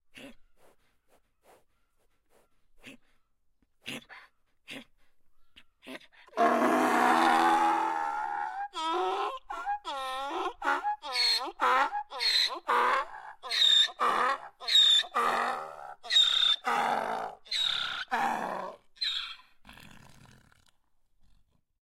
braying donkey - âne brayant
sound of a braying donkey recorded using a Zoom H4n digital recorder.
brayant, donkey, braying, ane